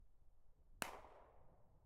The sound of a glock21 being fired
Glock21, field-test, 45